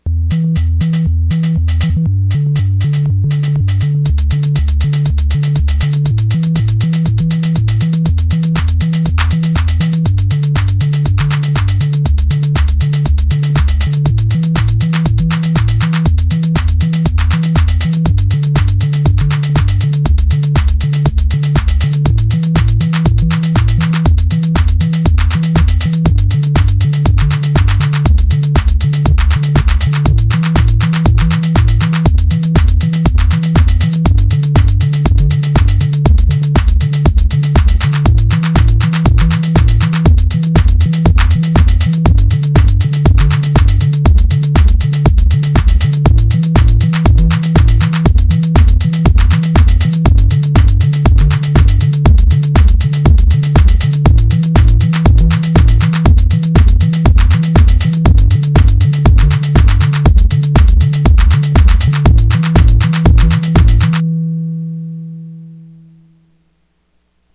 Minibrute / Drum test
Arturia Minibrute Sub Oscillator + reused and adjusted drumbed from "Can you hear me?" - test track (gritty version). Created July 17, 2019.